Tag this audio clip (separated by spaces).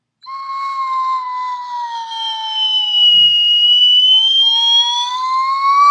Instrament-Rise Shrill-Whistle